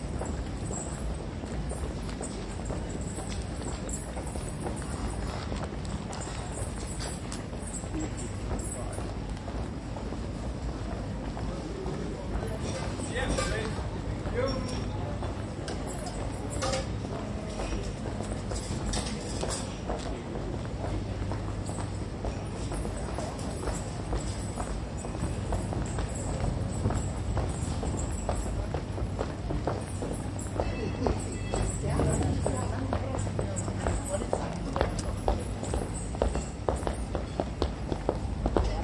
Airport ambience recorded at Copenhagen airport (Kastrup).